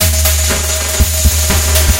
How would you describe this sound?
China Dream Convoloop q-00
This rhythmic drone loop is one of the " Convoloops pack 03 - China Dream dronescapes 120 bpm"
samplepack. These loops all belong together and are variations and
alterations of each other. They all are 1 bar 4/4 long and have 120 bpm
as tempo. They can be used as background loops for ambient music. Each
loop has the same name with a letter an a number in the end. I took the
This file was then imported as impulse file within the freeware SIR convolution reverb and applied it to the original loop, all wet. So I convoluted a drumloop with itself! After that, two more reverb units were applied: another SIR (this time with an impulse file from one of the fabulous Spirit Canyon Audio CD's) and the excellent Classic Reverb from my TC Powercore Firewire (preset: Deep Space). Each of these reverbs
was set all wet. When I did that, I got an 8 bar loop. This loop was
then sliced up into 8 peaces of each 1 bar. So I got 8 short one bar
loops: I numbered them with numbers 00 till 07.
120-bpm, ambient, rhythmic-drone